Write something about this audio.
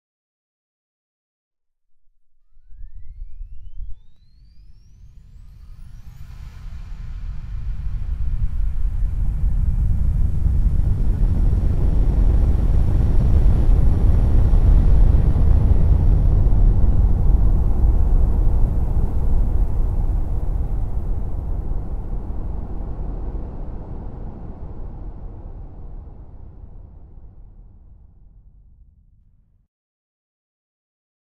spaceship takeoff
A sci-fi spaceship taking off.
Recording Credit (Last Name):
engine, engines, spaceship, future, takeoff, space, sci-fi